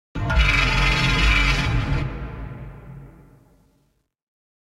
Apocalyptic Machine Sound Fx
Close your eyes, Imagine World War Three has just started, but you suddenly hear this instead of tanks running by and bullets flying by... Pretty creepy huh?
freaky, effect, digital, sound-design, sounddesign, war, apocalypsis, sfx, strange, electric, machine, sound, electronic, worlds, sci-fi, future, electrical